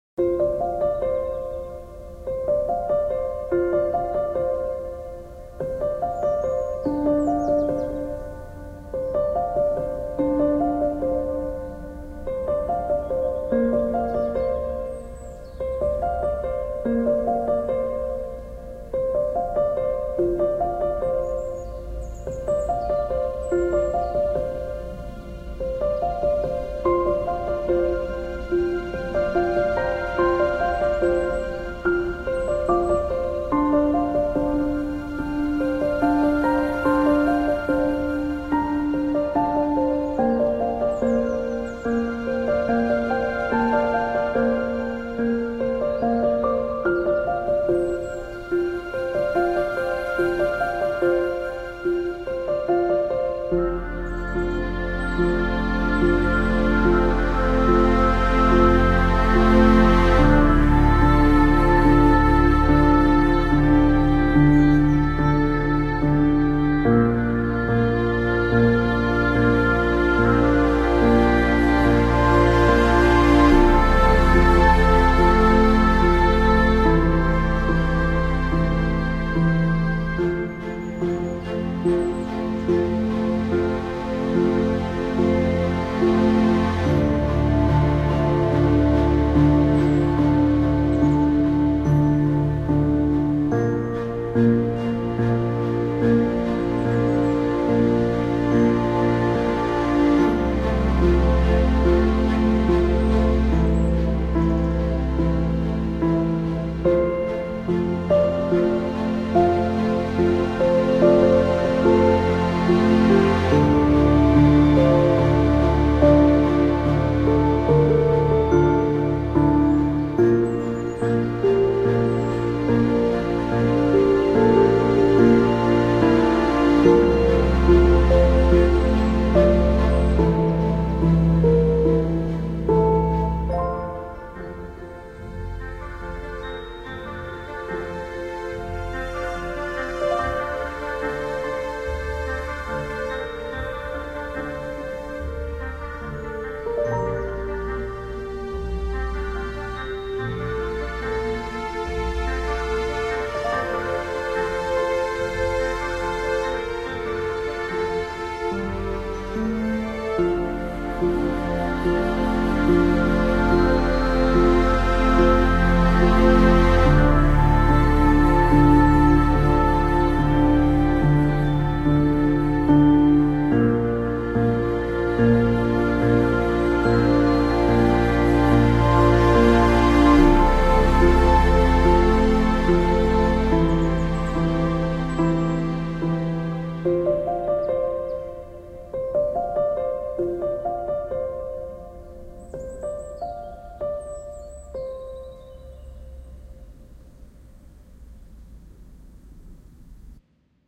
Relaxing Background Music
Track: 58/100
Genre: Relaxing, Orchestra
piano, string, Orchestra, bass, ambience, violin, music, woodwind, synth